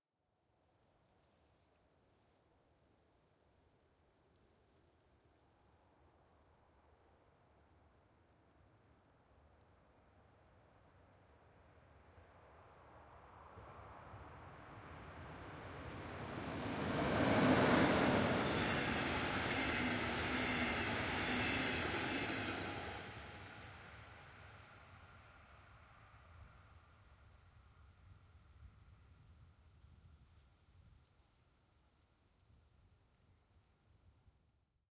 Inter-Regio passenger train passing in aprox 15m distance. Recorded in 90° XY with a Zoom HD2 at Priesterweg, Berlin